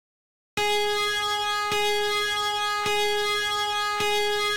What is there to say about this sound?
105 Undergrit Organ Layer 05
slighty gritty organ layer